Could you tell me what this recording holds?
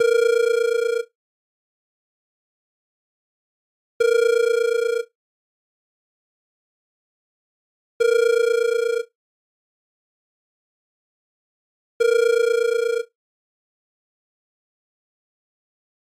phone ring
office sound FX
office, sound